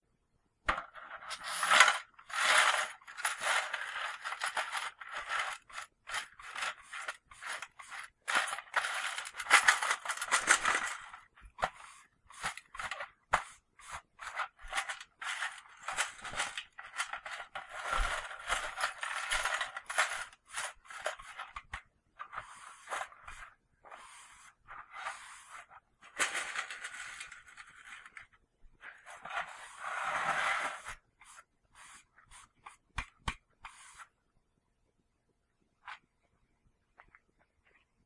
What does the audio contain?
Sweeping glass into metal dustpan
Another part of my light bulb smash cleanup process.
broom, dustpan, glass, metal, shard, sweep